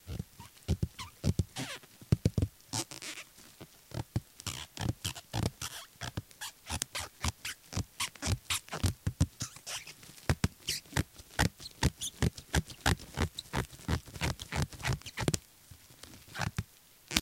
a set of samples created using one household item, in this case, bubblewrap. The samples were then used in a composition for the "bram dare 2"
it beats watching telly.........
bubblewrap, dare2